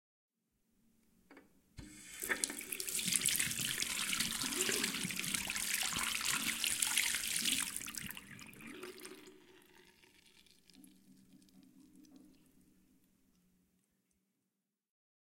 Tap Water
Metallic tap opens with flowing water into drain, rinse hands then closes with popping bubbles.
metal, metallic, close, bathroom, rinse, open, bubbles, running, popping, wash, tap, hands, sink, flowing, drain, water